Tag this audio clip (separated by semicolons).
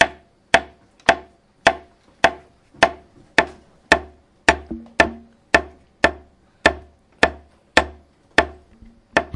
France; Mysounds; Pac; Theciyrings